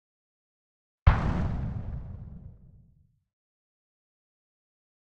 Synthesized Explosion 02
Synthesized using a Korg microKorg
bomb, dynamite, explode, explosion, explosive, grenade, synthesis